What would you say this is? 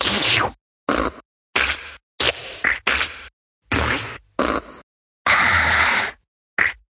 A bunch of farts and more.. Best with headphones :p
137bpm